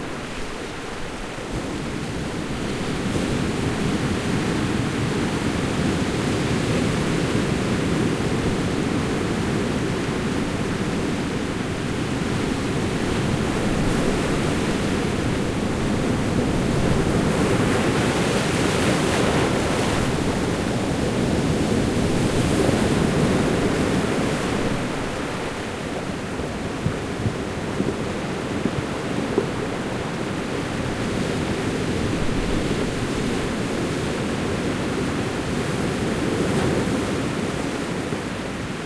Waves are hitting the beach after heavy winds. The rocks being hit are smaller then in Wave1, some of them can be heard being moved around by the incoming wave.
Beach, water